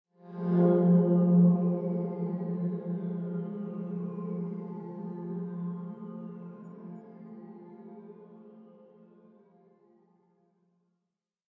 Volumes of Echo Pad
This sound was created with the plugin "Vital"
Honestly was twisting turning knobs as I don't personally know much of synths and all that, but until there was something I was happy with I resampled me holding a key to get the background echo noise then slightly through on a filter and faded the beginning and end.